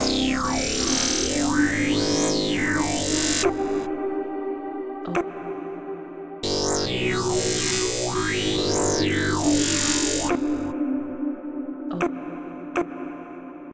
dirty synthesizer arabic-scale melody. cheers :)
melody, 140bpm, drums, wobble, delay, dubstep, dirty, pad, phaser, atmospheric, loop, synthesizer, arabic, reverb